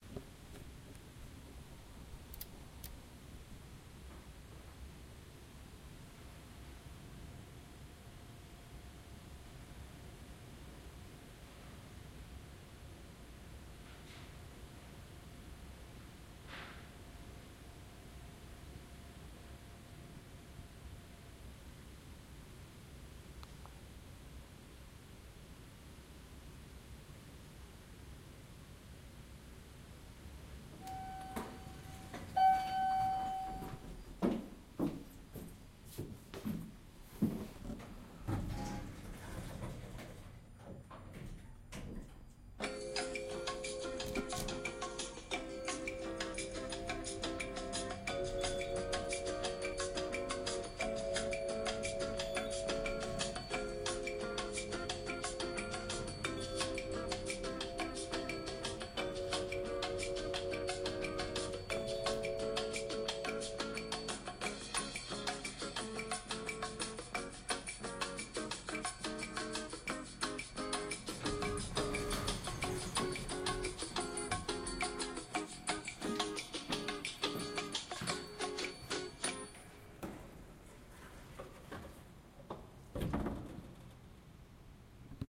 elevator music played in an elevator
doors, elevator, elevator-music, waiting